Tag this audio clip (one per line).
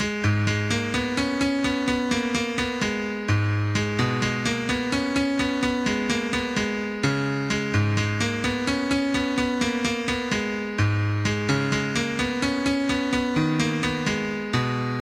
Records
acoustic-piano
Piano
Keys
melody